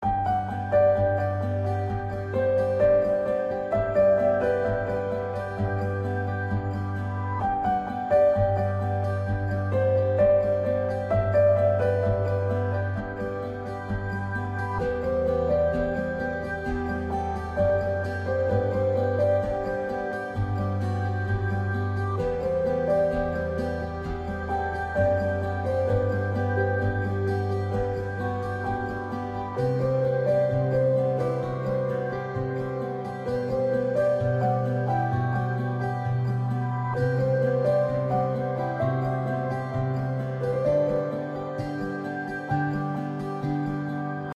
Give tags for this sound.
Loop; piano

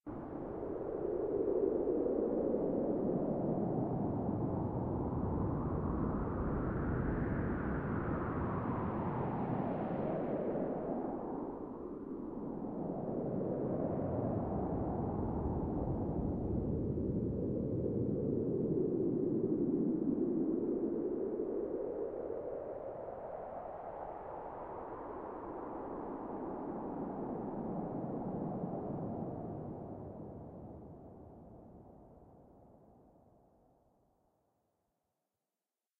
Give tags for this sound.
blowing; white-noise; wind

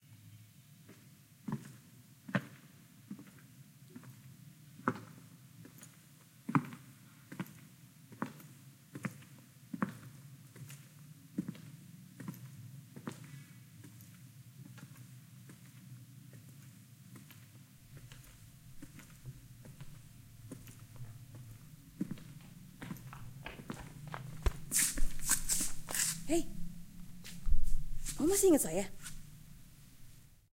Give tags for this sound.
audacity; fun; good; man; smile; smiling; very; voice; walking

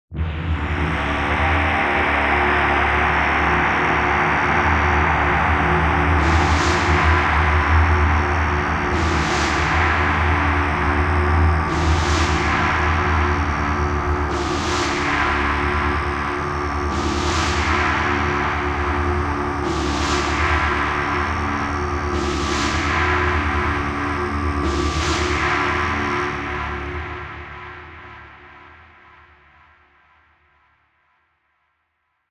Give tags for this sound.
ambient; apocalyptic; atmosphere; atmospheric; background-sound; dark; horror; Mongolian

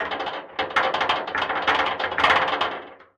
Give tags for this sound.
metal-cabinet; office; metal; cabinet; cabinet-door; filing-cabinet; rattling; door; rattle

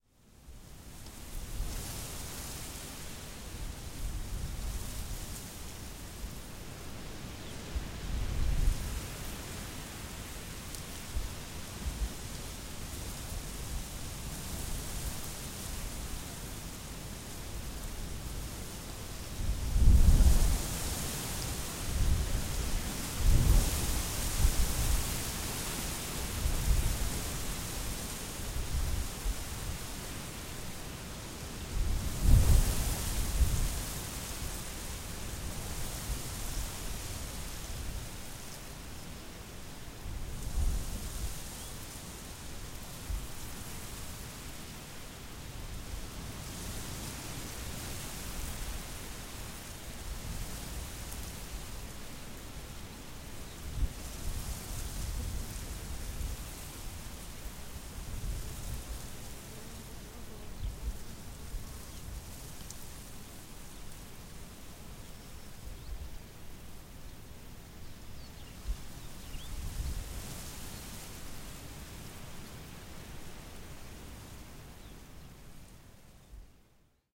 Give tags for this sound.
ambience; field; agriculture; exterior; nature; atmosohere; ambient; wind; atmos; farmimg; rural; field-recording

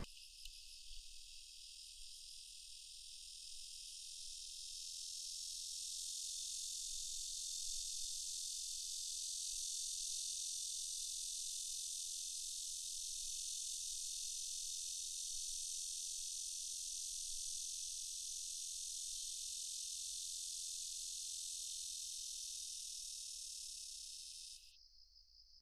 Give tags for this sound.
ambient; bugs; buzz; china; field-recording; insects

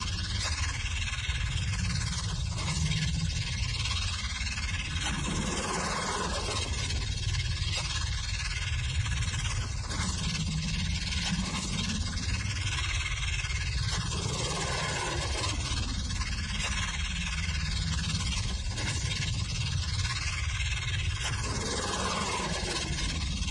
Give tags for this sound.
ambience; dark; drone; engine; horror; loop; low; mechanism; pulse; rumble; stutter; sustain

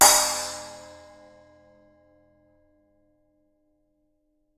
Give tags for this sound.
percussion; perc; cymbal; splash

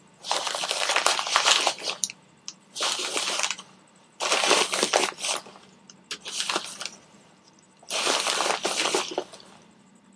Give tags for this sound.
bag; stuffing

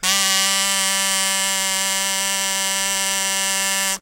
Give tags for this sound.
free; kazoo; multisample; sample; sound

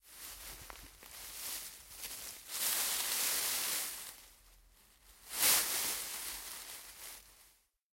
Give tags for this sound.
tree; leaves; ambience; rustling; trees; Nature; field-recording; leaf; rustle; forest

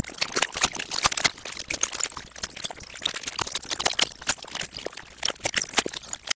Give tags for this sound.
skipping; glitch; tape; noise; lo-fi

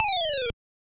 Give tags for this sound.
game; Pitched; Arcade; 8-Bit; computer; school; 8bit; old; Lo-fi

